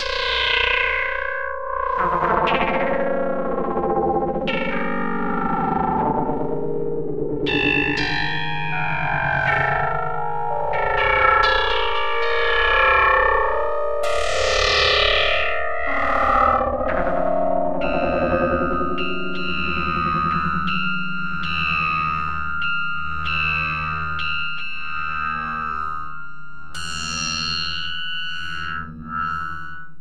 MOV. efecte rebot